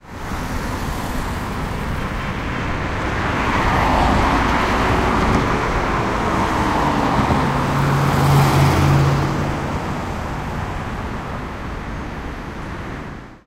Cars passing by.
Recorded with Zoom H2. Edited with Audacity.
car, drive, driving, engine, engines, highway, vehichle